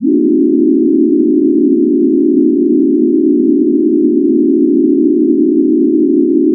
These were made for the upcoming Voyagers sequel due out in 2034.